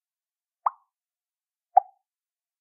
Mouth made water dropping sound on a puddle/bucket/glass full of water.
Recorded with Audacity and edited with Adobe Audition.
Agua, Pop, Water, Pingo, Raindrop, SFX, Drop